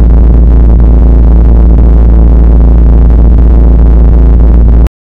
Behringer neutron static
My behringer neutron with no midi input, rather strange